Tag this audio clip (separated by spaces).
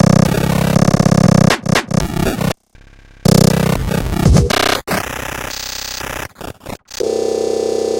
android art artificial bit command computer console cyborg databending droid drum error experiment failure game Glitch machine rgb robot robotic space spaceship system virus